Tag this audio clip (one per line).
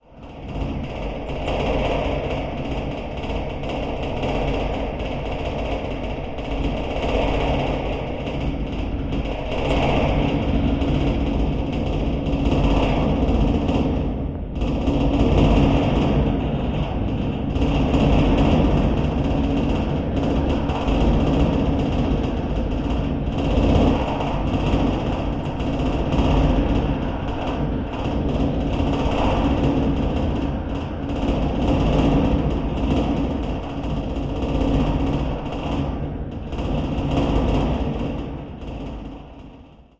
bridge
contactmicrophone
eerie
metallic
newport
railing
strange